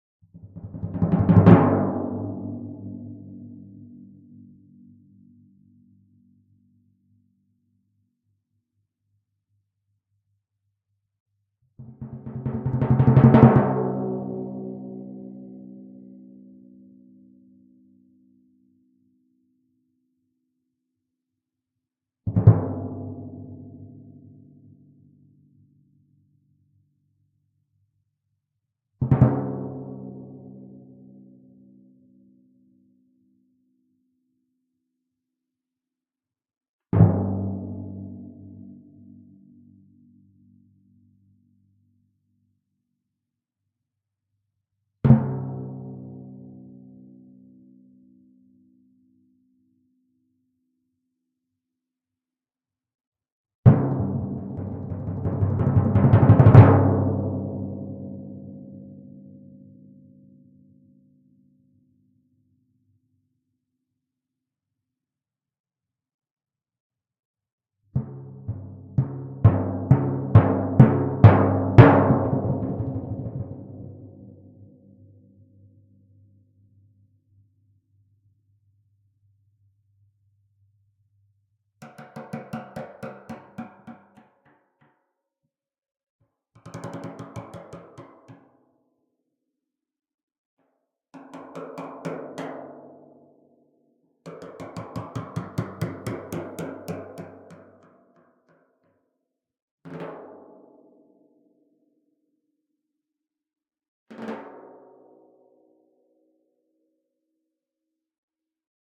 timp rolls
some timpani rolls, flams, etc. 'performed' (i certainly do not claim to be a good timpanist) on both drums, tuned to G (low) and C (high). mono, mic positioned about 80 cm above and between drums.
recording setup (applies to all these timpani samples): Marshall MXL 2003 condenser mic > ART Digital MPA tube preamp > M-Audio Delta 1010.
drum, drums, flickr, hit, percussion, timpani